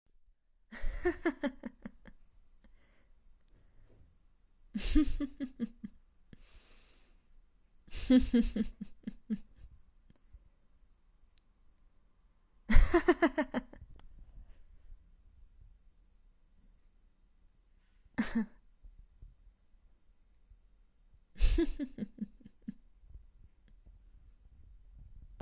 Laugh - female
chucke, creepy, disturbing, female, grin, happy, horrorlaugh, laugh, malefic, smile, sneer